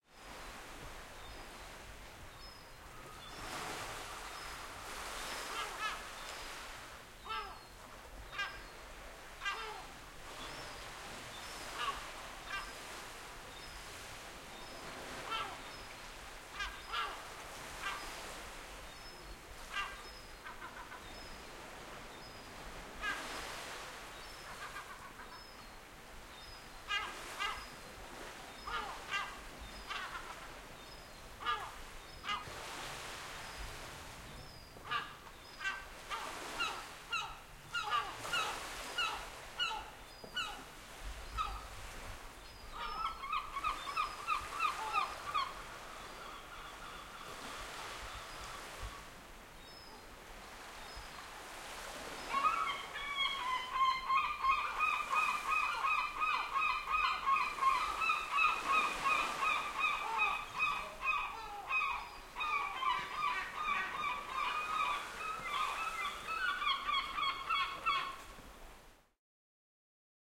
Sea Seagulls on cliff
Seagulls nesting on a cliff at Etrétat (Northern France).
cliff, field-recording, nature, sea, seagulls